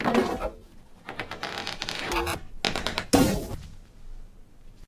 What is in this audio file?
Processed Balloon Sequence
Stroking a Balloon in various ways, processed.
alien, noise, random